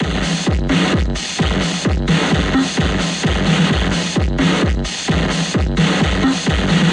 EDM Distorted Drum Loop
A really distorted analog drum loop, slightly off the rhythm.
beat, distorted, distortion, drum, drumset, edm, electronic, hard, loop